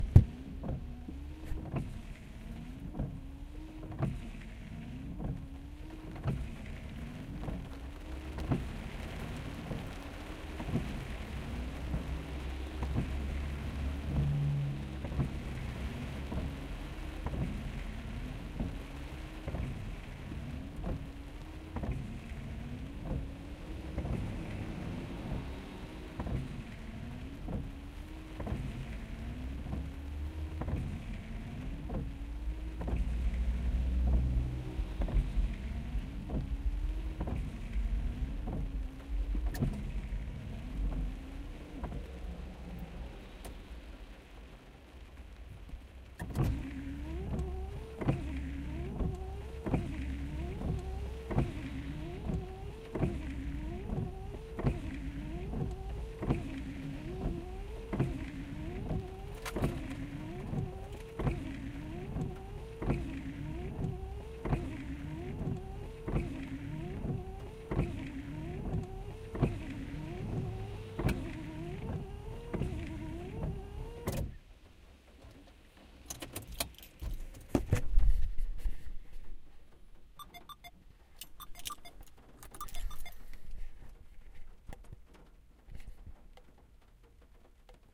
windscreen wipers light rain
windscreen wiper blades from Toyota Hiace, 2 speeds.
blades, car, city, rain, windscreen, wiper, wipers